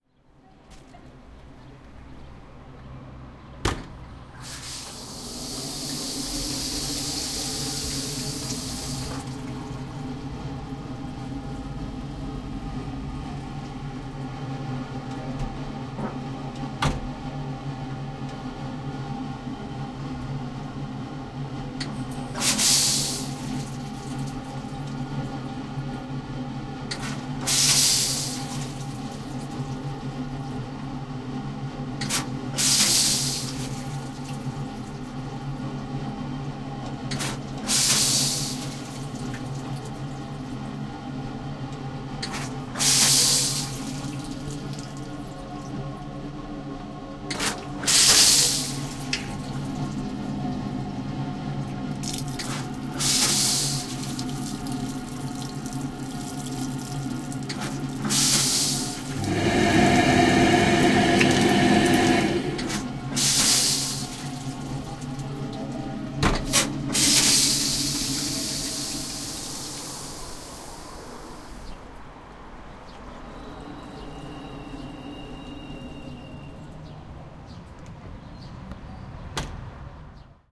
Urinal spacecapsule
A peculiar futuristic urinal "space pod" in a park in Oslo. The dim lights turn on just after the automatic doors isolate you from the outside, and you are greeted with a small room with sprinklers on the wall to urinate on. After a few moments the pod decides you're done and turns on the faucet; first with soapy water then clean water, after which you get a moment of warm air from the hand dryer.
To open the doors you push a button which also turns off the lights, leaving you in the dark for a short moment until the doors let in enough light to see, and you step back out in the real world.
blower, field-recording, flushing, hand-wash, surreal, water